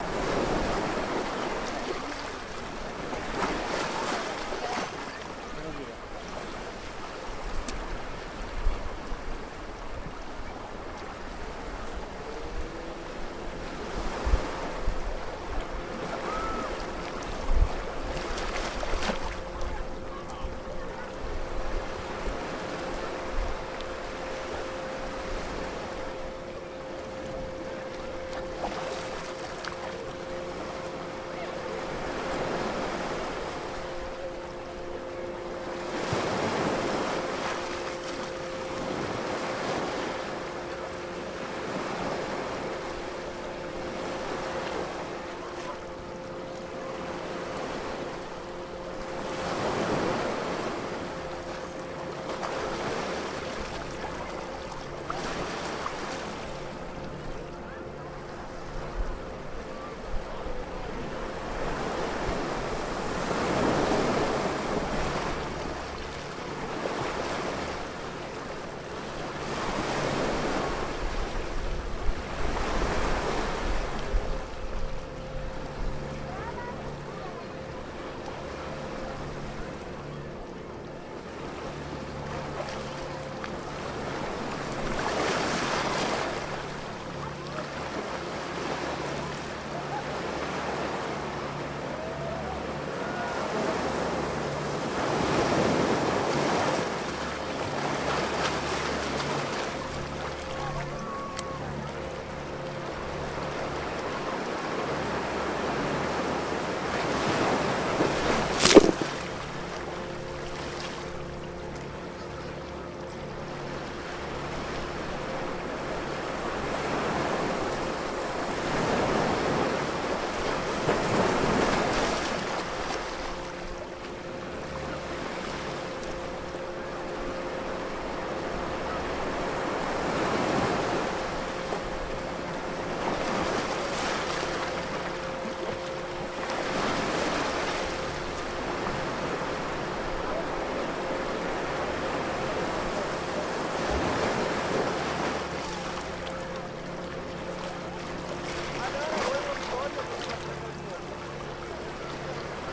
100802-GCSR-plaprcsf-1
Beach atmosphere
Noontime close shot of the surf on the Playa de Puerto Rico on Gran Canaria, lots of bathers and the occasional boat in the background.
Recorded with a Zoom H2 with the mics set at 90° dispersion.
This sample is part of the sample-set "GranCan" featuring atmos from the island of Gran Canaria.
beach, children, close, crowd, daytime, field-recording, leisure, spain, sunny, surf